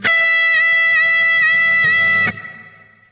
12th fret notes from each string with tremolo through zoom processor direct to record producer.
dive, whammy, electric, note, tremolo, guitar